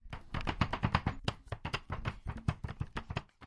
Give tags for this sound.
panska; quicker; czech; stamping; cz

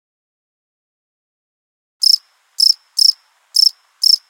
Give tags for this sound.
clean; close; cricket; dry

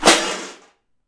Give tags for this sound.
aluminum; cans

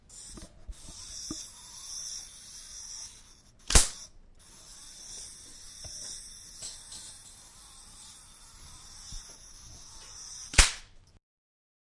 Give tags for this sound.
slapstick; Crash

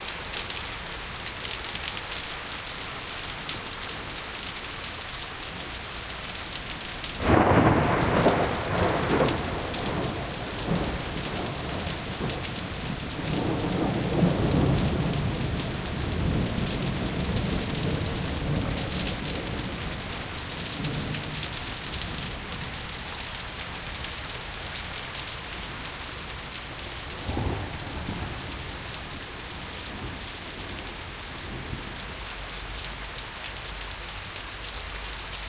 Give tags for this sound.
rain; field-recording; street

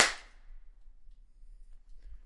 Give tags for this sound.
reverb; cave; impulse-response; space; IR

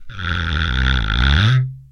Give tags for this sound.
friction; wood; idiophone; daxophone; instrument

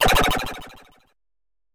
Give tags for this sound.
effects; Gameaudio; SFX; sound-desing; indiegame; FX; Sounds

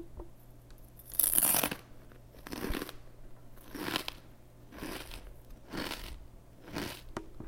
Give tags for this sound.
Cereal; Crunch; Eating

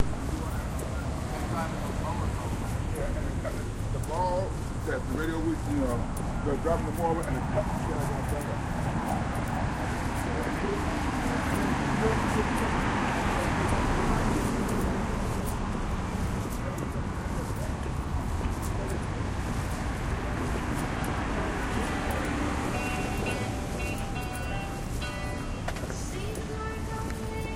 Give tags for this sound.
digital; test

